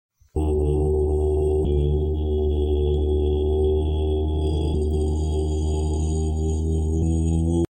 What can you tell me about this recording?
Deep voice 01
Recorded a monks chanting myself and edited on audition
Buddha, Buddhism, chant, Deep, mantra, meditation, monastery, monk, monks, Singing, Thorat, Voice